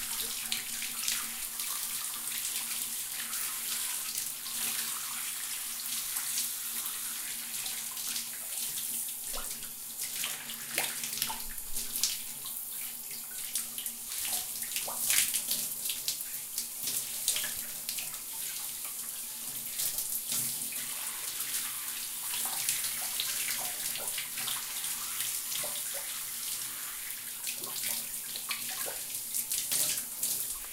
Sound of shower in bathroom
bath, bathroom, shower, water